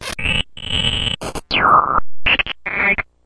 A really destroyed beat from an old drum machine processed with Nord Modular and other effects.
modular, noise, idm, synth, sound-design, 808, glitch, beat, drum, digital